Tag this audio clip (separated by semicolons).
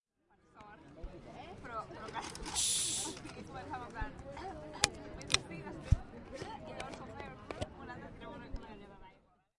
UPF-CS13; pepsi-bottle; campus-upf